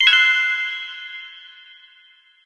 eventsounds3 - high bleeps 5
I made these sounds in the freeware midi composing studio nanostudio you should try nanostudio and i used ocenaudio for additional editing also freeware
event, blip, intros, sound, game, bootup, sfx, effect, desktop, application, click, startup, intro, bleep, clicks